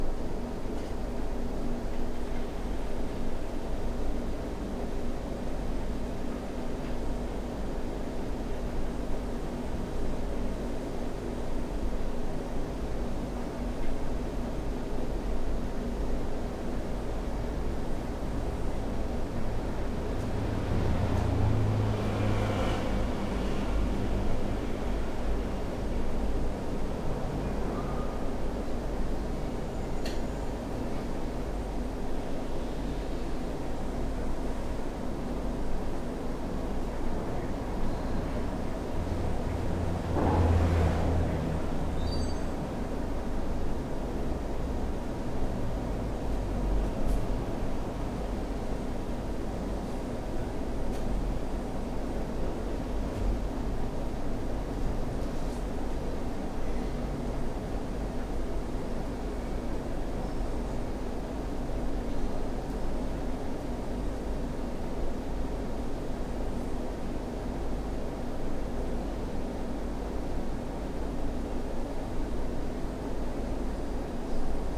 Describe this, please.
indoors empty bar noisy ambient 1
empty, indoors, noisy